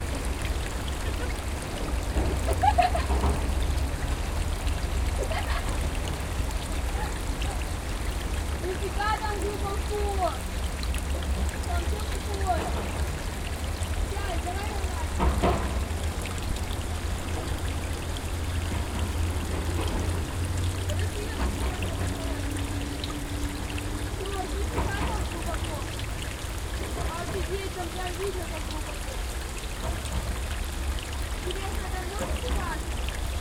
Sound of water flow on roll. Voices and laugh of two girls on the background sound. This water flows from wastewater tube the riverside near Leningradsky bridge.
Recorded: 2012-10-13.